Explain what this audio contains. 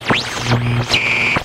Sweeping the shortwave dial -- noise & tones filtered by changes in radio frequency.
radio, shortwave, sweep